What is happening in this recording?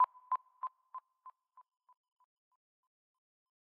An IR that can be used to create a reverb with a disturbing end tail. An IR whith both delay and reverb. Created by recording the impulse response of a signal going through Echo Farm and ReVibe.